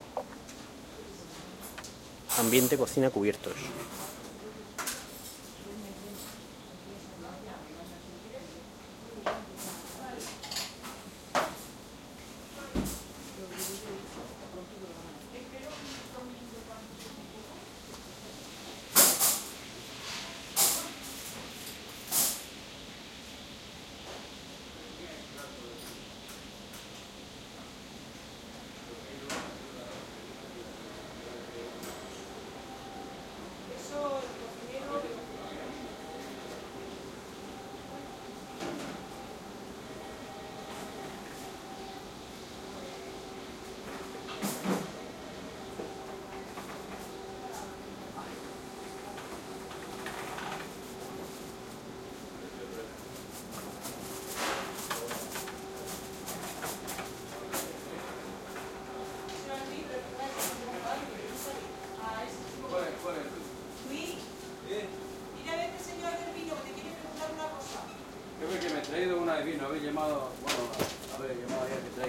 Ambient in a kitchen in a restaurant in Spain, that includes cutlery, appliances, cutting, chopping and frying with some air conditioning